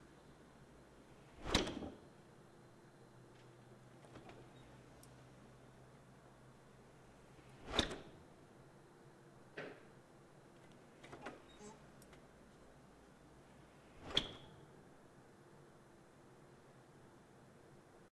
Fridge Door Open and Close (3 times)
The sound of a heavy fridge door opening and closing several times. You can hear jars of condiments and drinks shaking as the door shuts.
-AH
fridge, slam, foley, close, opening, air-lock, door, refrigerator, closing, airlock, swoosh, open, vacuum, fridge-door, door-open